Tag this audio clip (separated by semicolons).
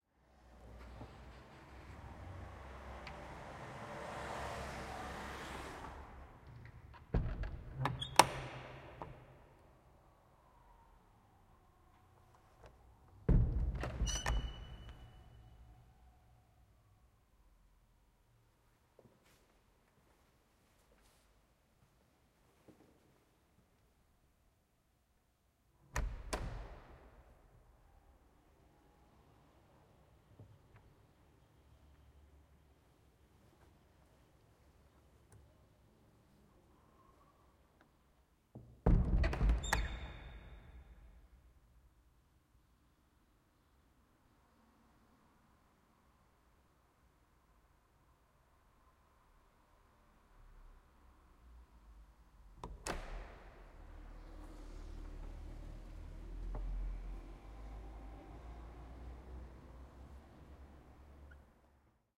chreak; church; close; closing; clunk; door; doors; field-recording; fieldrecording; handle; hinge; old; open; opening; squeak; squeaky; wood; wooden